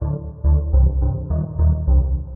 PLASMA 105bpm
plasma, sample, dark, electronic, 105bpm, flstudio